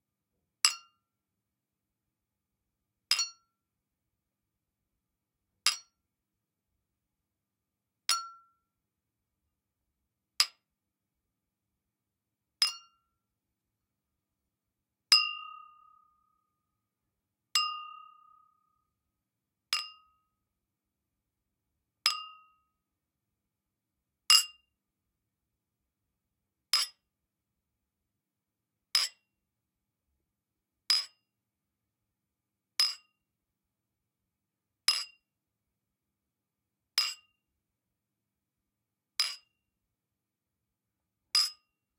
Glass Clink Pack
Jar, Knife, Glass, Glass-Bowel, Shattering, Wine-Glass, Breaking, Cracking, Crack, Metal, Glass-Jar, Fork, Dong, Breaking-Glass, Spoon, Knock, Glass-Cup, Clinking, Foley, Cleaning, Dinner, Kitchen, Clink